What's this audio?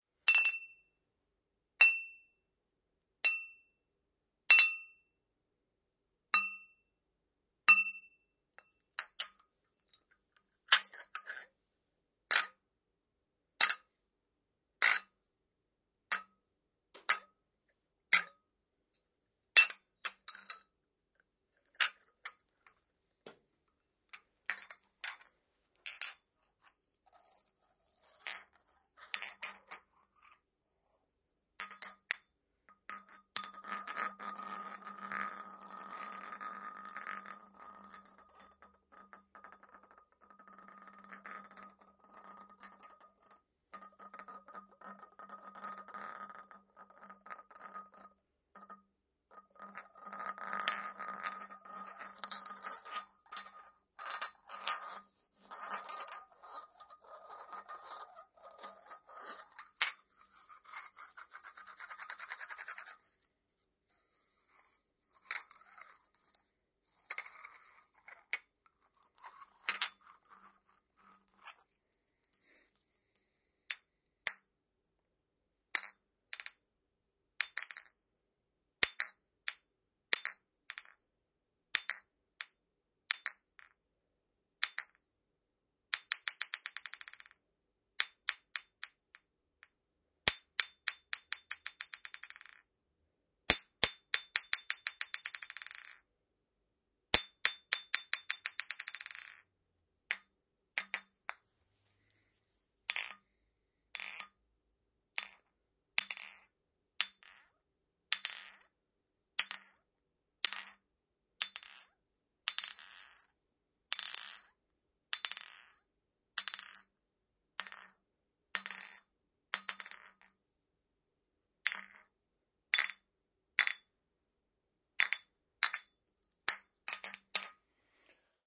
An assortment of sounds made using a couple of empty glass bottles.
impact, glass, bottle